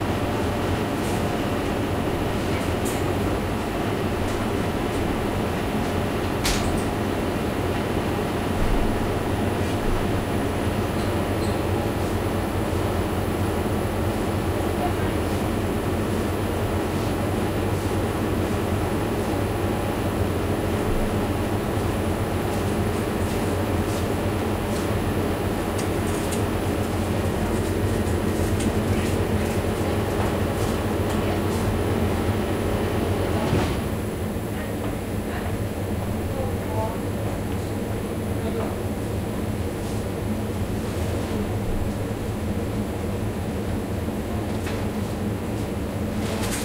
shop refrigerator3
Hum of the refrigerator in the super market.
See also in the pack.
Recorded: 08.03.2013.